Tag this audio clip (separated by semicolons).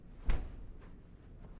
body; wall